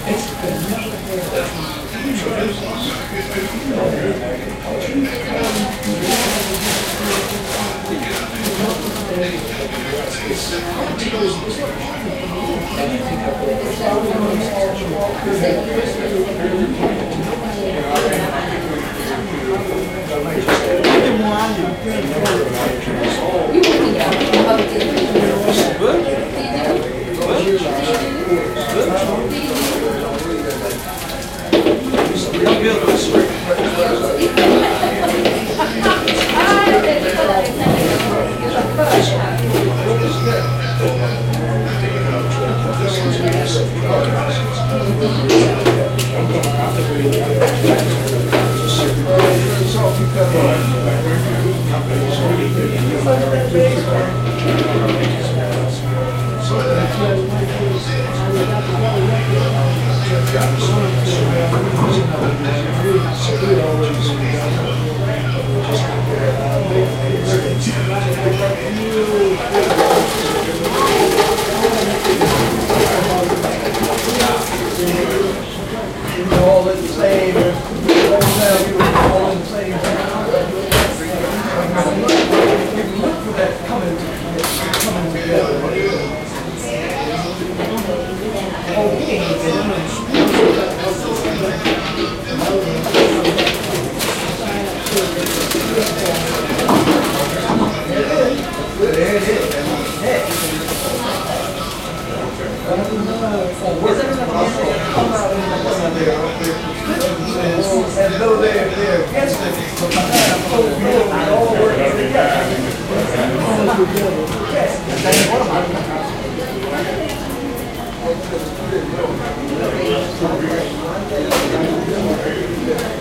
Fast Food restaurant3
Inside a American fast-food restaurant from seating area.
people, walla, atmosphere, white-noise, field-recording